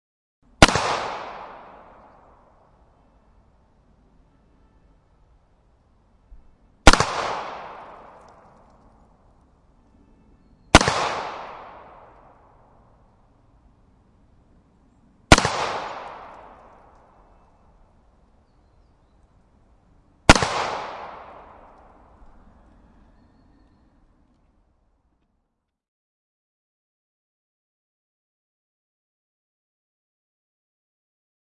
gun 9mm 15m in front m10
Field-recording of a 9mm pistol at 15 meter distance on a shooting range using a Sony PCM m-10.
sony-pcm-m10, gunshot-echo, medium-distance, pistol, close-distance, weapon, gunshot-reverb, gunshot, gunfire-tail, outdoor, shooting-range, 9mm